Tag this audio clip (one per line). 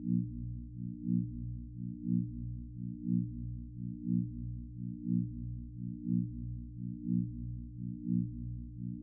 Sci-Fi
Synthetic
Machine
Machinery
Hum
Power